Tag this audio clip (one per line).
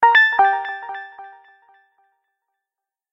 FX
indiegame
SFX
sound-desing
Sounds